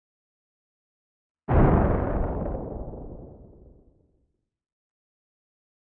Synthesized Thunder 04
Synthesized using a Korg microKorg
lightning, thunder, synthesis, weather